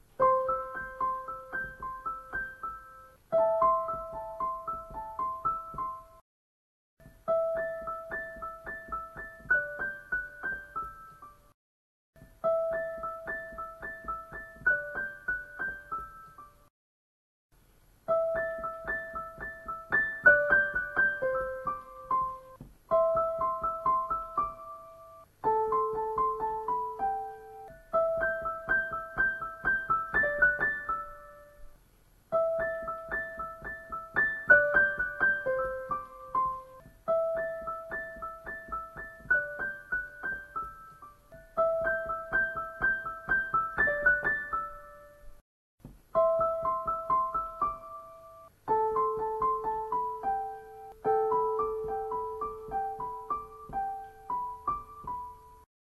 Peaceful Piano Loop
Recoded on a Razer mini.
Piano, Peaceful, Ambiance, atmosphere, Loop